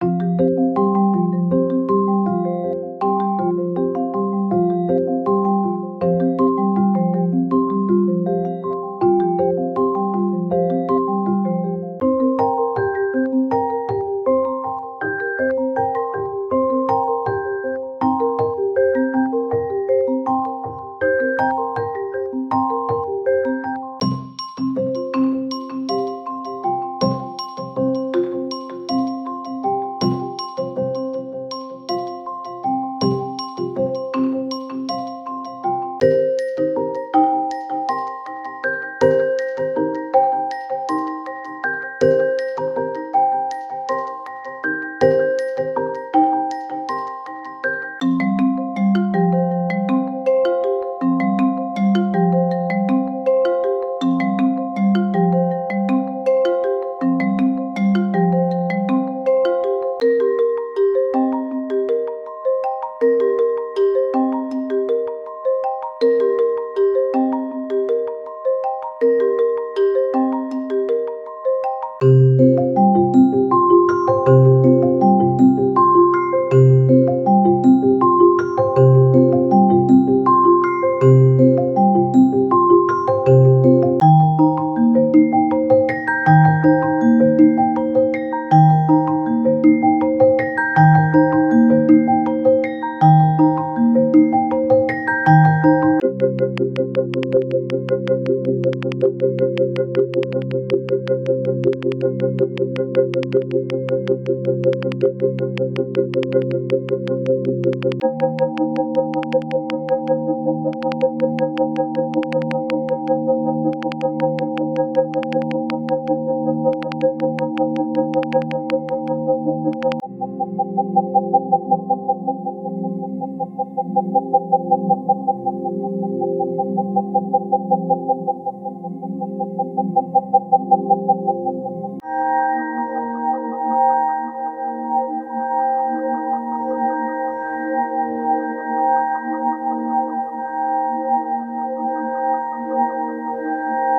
RMR Morphagene Reels 122220 Mallet Flux 01 80 BPM
A collection of 80 BPM samples for Morphagene using Native Instruments Mallet Flux.